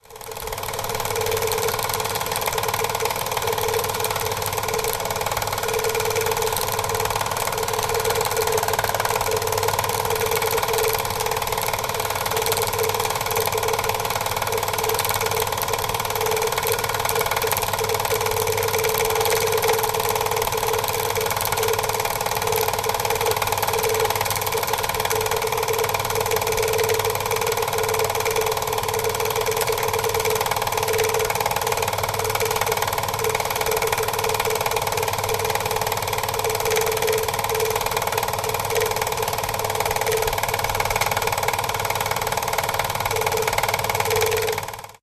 Film Projector Bauer T 10 S
The sound of my film projector while running an old 8mm film. You can hear the film running through the gate and over the sprockets. It's a Bauer T 10 S projector of the 50s'
8mm, bauer, cine, double8, field-recording, film, projector, sound